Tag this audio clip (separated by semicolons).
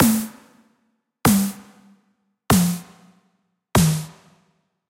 80s; synthesised; drums; tom; synthwave; drum